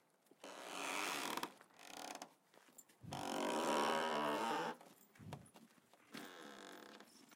Gate door drawbridge wooden creaky opens closes hinge
Creaking sound of an old wooden farm gate with 2 large hinges opens and closes. Could be used as a door or drawbridge sound.
Squeaking, Wooden, Creepy, Old, Farm, Free, Door, Creaking, Shut, Closing, Sound, Open, Squeak, Wood, Opening, Creak, Close, Gate